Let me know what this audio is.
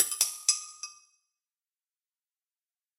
Ceramic Bell 07
bell, ceramic, chime, groovy